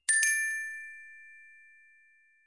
Short Success Sound Glockenspiel Treasure Video Game
A simple, short success/alert sound made by the glockenspiel sound on Musescore. Enjoy!
alert, bonus, coin, game, happy, level, notification, positive, score, short, success, treasure, triumph, victory, video-game, win